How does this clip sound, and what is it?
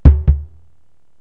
mid rack tom heart beat
My mid and floor toms hit to sound like a heart beat.
drums, floor, live, recording, rock, tom